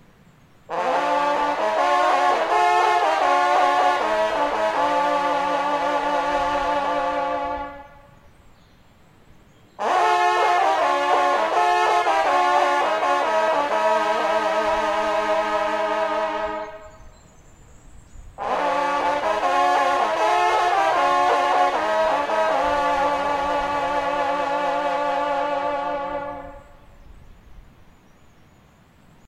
Hunting horn - Duo
Two hunting horns playing duo in the woods. Exterior recording - Mono.
Recorded in 2003.
Hunting, hunt, music, horn